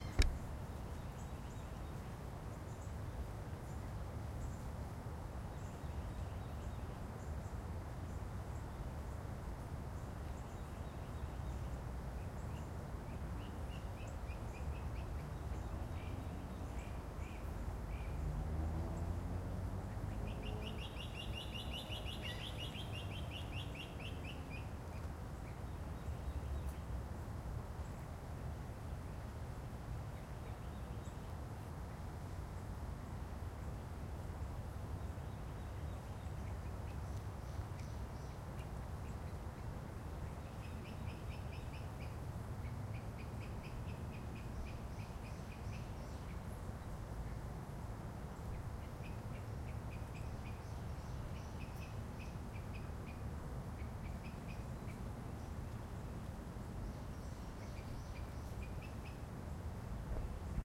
Recorded in a small wetland preserve in North East Florida traffic in the background sorry about the beginning thud.
ambiance, ambient, bird, birds, field-recording, Florida, nature, t, Tags, traffic, Wetlands